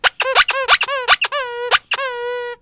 Squeaky Toy 5
Made by squeezing a squeaky toy